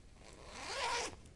Just a little zipper collection.
closing, clothing-and-accessories, opening